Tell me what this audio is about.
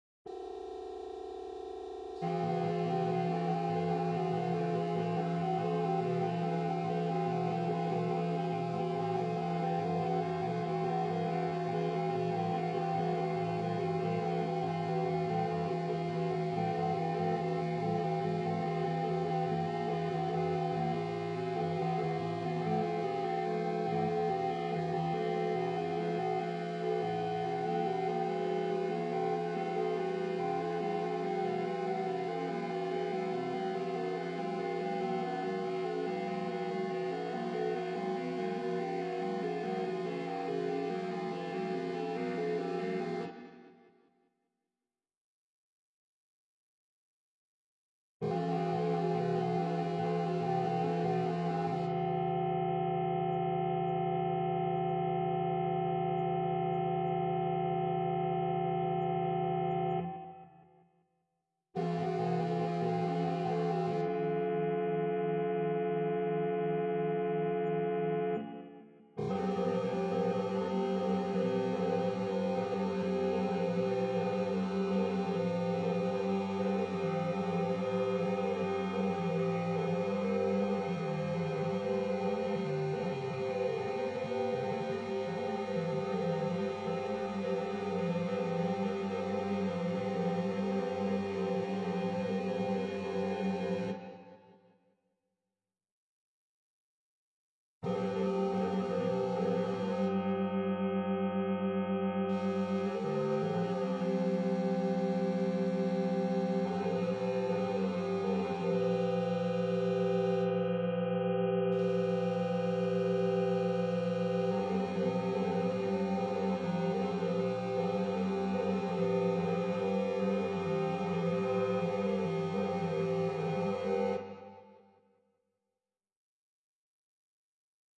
Annoying drones
Drones created by spectral manipulation of an electric organ sound in Reason.
Some of the notes have a chaotic pulsating timbre.